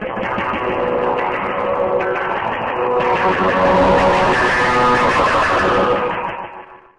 Battering my guitar, semi-live.